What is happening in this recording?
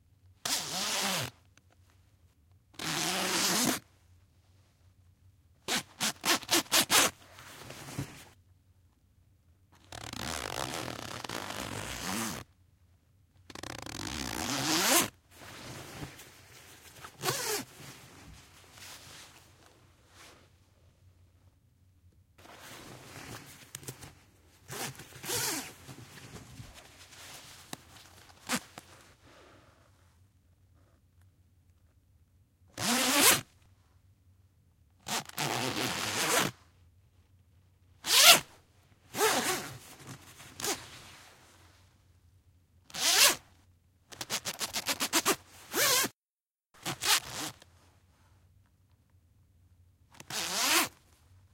This is a nylon coat with a wide-tooth zipper being zipped and unzipped at various speeds plus a couple of intermittent 'stuck zipper' sections. In several places I left the swishing sound of the nylon coat in to simulate the sound of a sleeping bag being zipped/unzipped.
Recorded with stereo NT1a mics in a soundbooth. No effects.
backpack, jacket, sleeping, zipping, coat, down, zipper, bag, nylon, unzipping, unzip, jeans, zip, tent